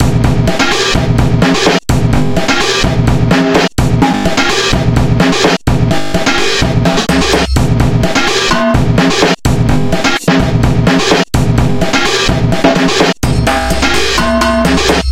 VST slicex combination + FL studio sequencer + db glitch effect vst
+ a hit hat (amen break) additional